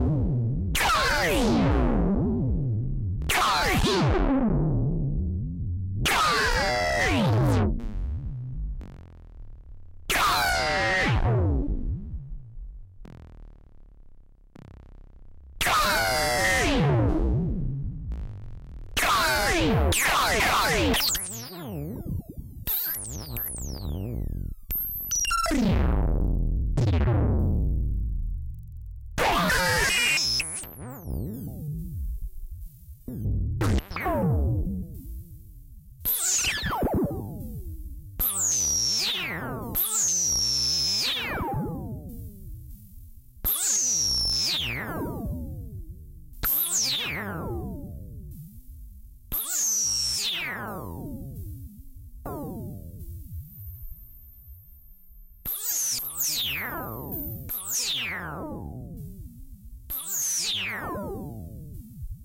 dist crack squeeelch
Doepfer system, somewhat distorted, envelope on the pitch.
analog, doepfer, dying, whale